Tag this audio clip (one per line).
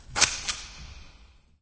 alien
ambient
artificial
atmosphere
drone
effect
experimental
fx
pad
sci-fi
scifi
soundscape
space
spacecraft
spaceship
ufo